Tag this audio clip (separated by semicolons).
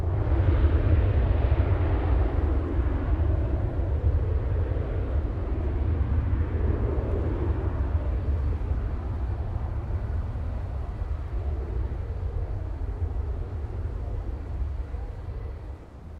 Aircraft; Distant; Exterior; Field-Recording; Flight; Jet; LAX; Plane; Takeoff